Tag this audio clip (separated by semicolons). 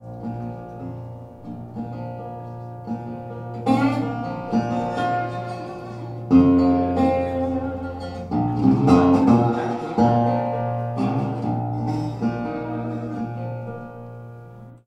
bottleneck-slide; national; resonator; reso-phonic; slide; stereo; tricone